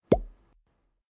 VS Pop 8
Simple Cartoon Pop Sound. Recorded with Zoom H1 and Ableton Live.
animation, blop, bubble, cartoon-sound, foley, pop, pop-sound, sfx